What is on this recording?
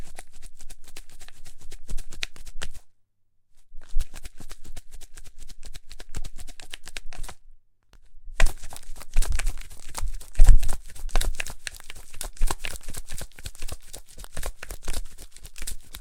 Onions shaking in hands, recorded with AKG PERCEPTION 170 INSTRUMENT CONDENSER MICROPHONE